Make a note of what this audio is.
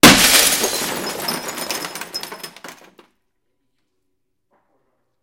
breaking-glass, indoor, window, break
break, breaking-glass, indoor, window